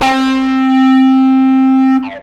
Recorded direct with a Peavey Dynabass in passive mode, active mode EQ is nice but noisy as hell so I never use it. Ran the bass through my Zoom bass processor and played all notes on E string up to 16th fret then went the rest of the way up the strings and onto highest fret on G string.
multisample, electric